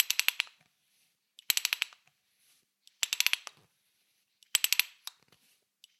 Ratchet drill - Unbranded - Tighten
Unbranded ratchet drill tightened once.